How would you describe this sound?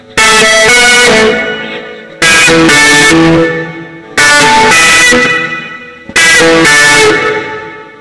Segments of rhythm electric guitar at 120bpm by request. File name and tags indicate processing or lack there of. Segments recorded on Strat clone with Zoom 3000 processor without a pick.